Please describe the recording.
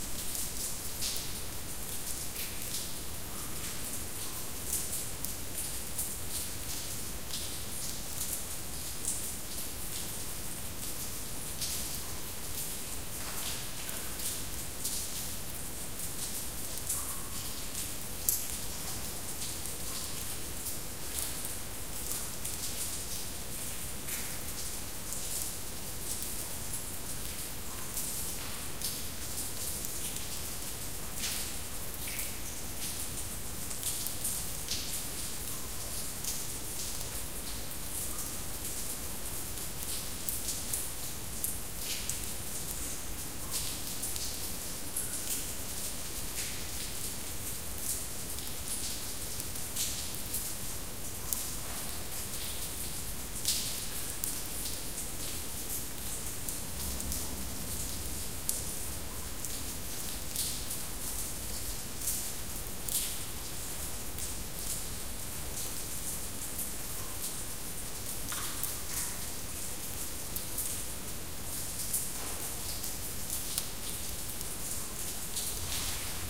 Phong Nha "paradise" cave water dripping and small torrent
Sound of water dripping in a large cave, with a small torrent running in the background. Recorded by Mathias Rossignol.
cave, drip, dripping, drop, drops, torrent, water